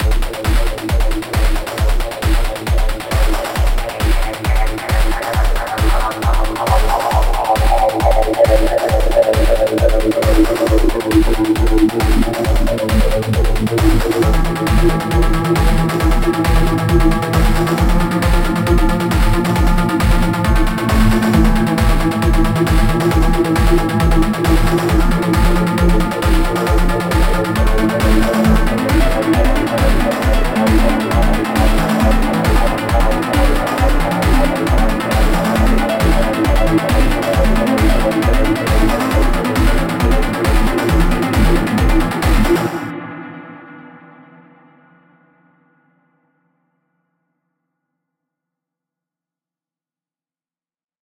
Video game music loop
A loop of sound for a video game, or for a suspenseful or a fighting atmosphere. Made with FL Studio.
loop, electronic